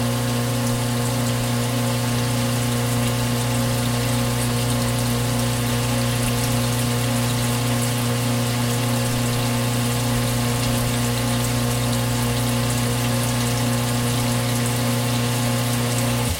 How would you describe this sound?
Under the sink with the cabinet door closed while running water and garbage disposal, can you guess which one is which?